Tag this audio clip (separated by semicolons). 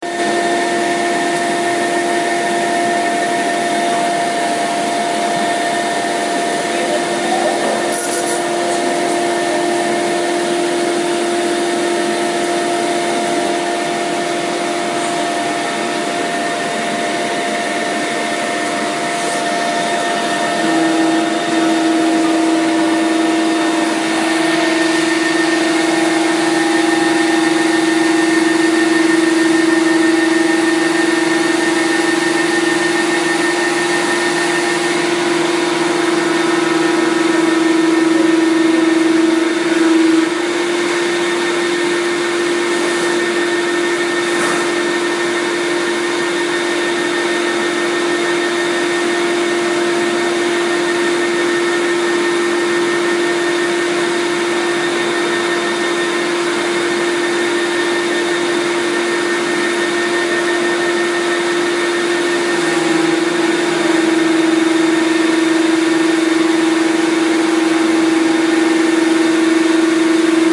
electric; highpitch; machine; motor; zoom